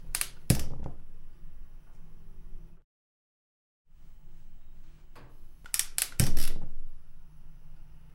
Ignition of gas on the kitchen using piezoelectric lighter. Two variants. There using old soviet gas-cooker.
USSR, fire, gas, gas-cooker, kitchen, soviet